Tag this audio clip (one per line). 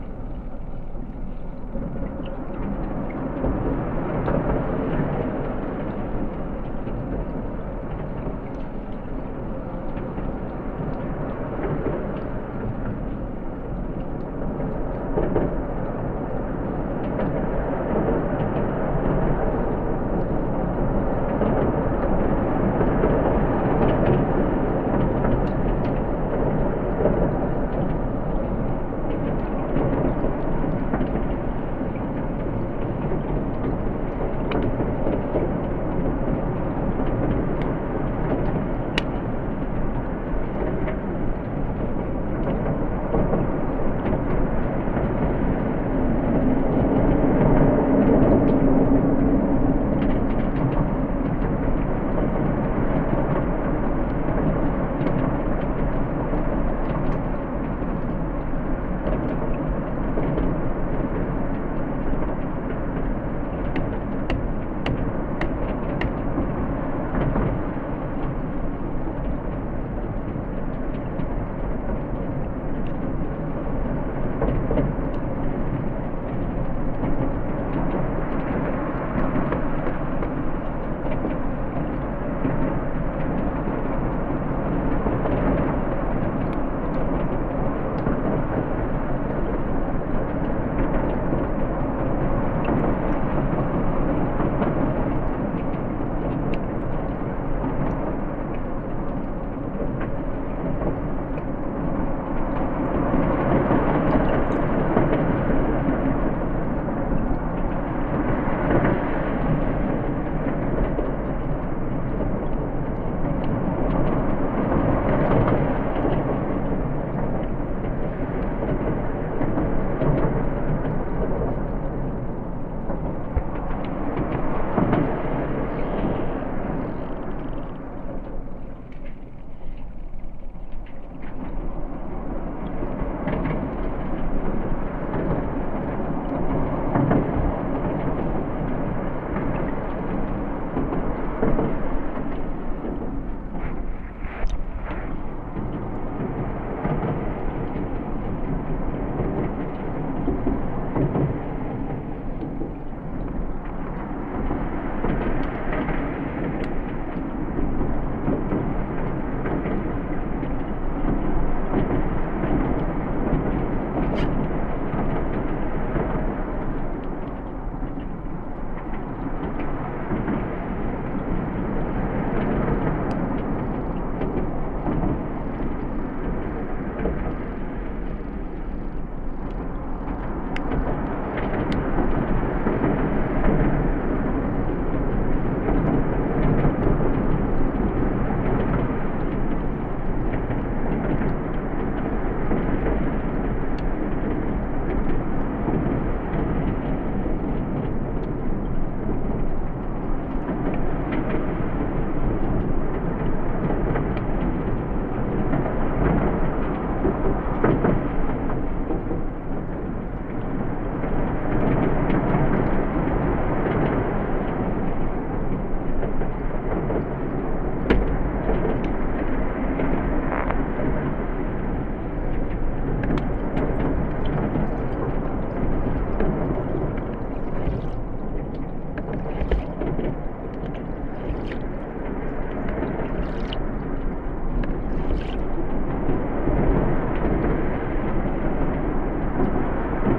bridge
contact-mic
cars
engines
underwater
clacking